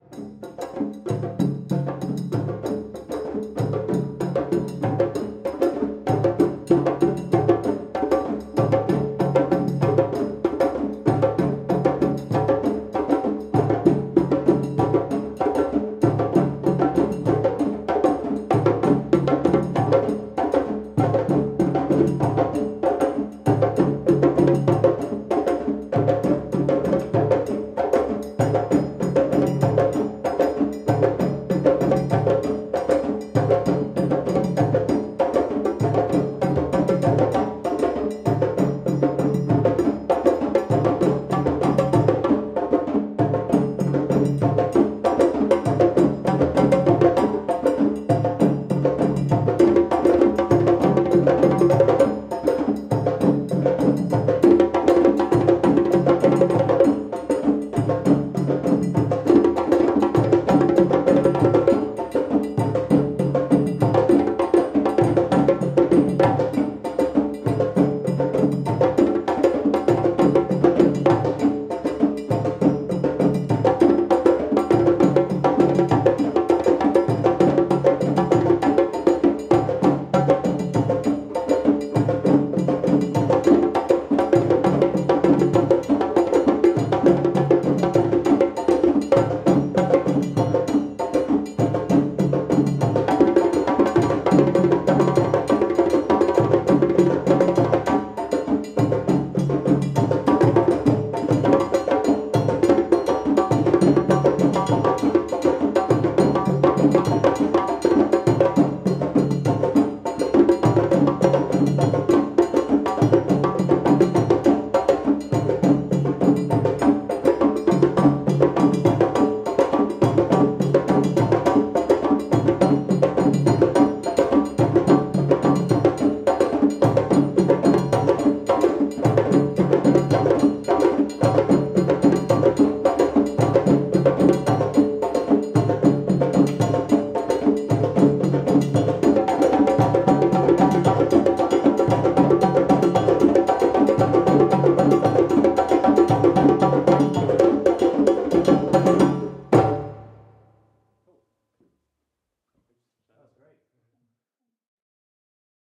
African tribal drums, beat 3
Recorded on Zoom H4n.
Tribal drum beat performed by Drum Africa, London, UK.
people, drumming, african, beat, human, person